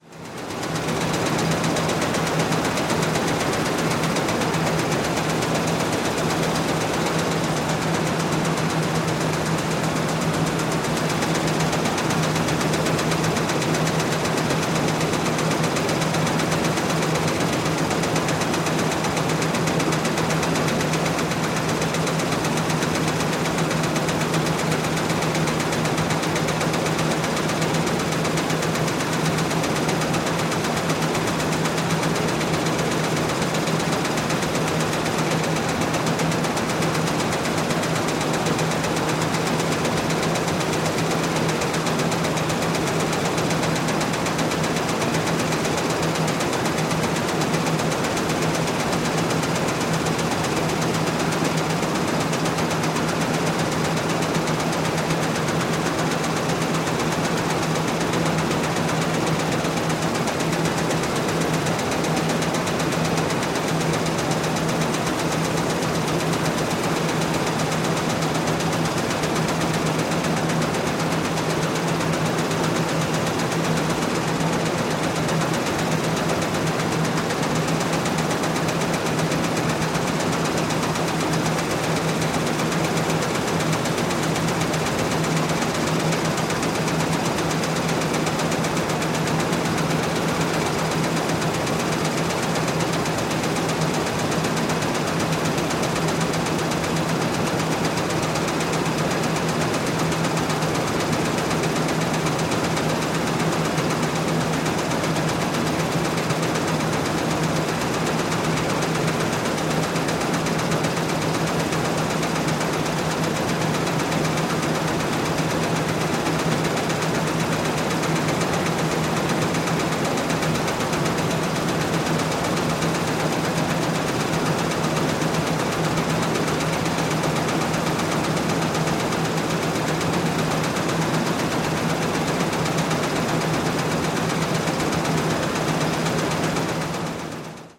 Factory machine 04: mono sound, registered with microphone Sennheiser ME66 and recorder Tascam HD-P2. Brazil, june, 2013. Useful like FX or background.